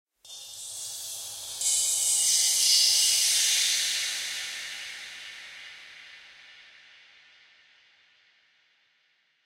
water poured into metal bowl effected
pouring water into a metal bowl with some effects added afterwards
sound-effect, field-recording, water, metalic